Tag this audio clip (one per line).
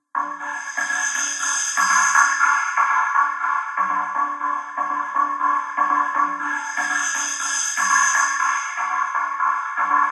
drums-beat
drums
fx
drums-loop
texture
psychedelic
vcoder
ambient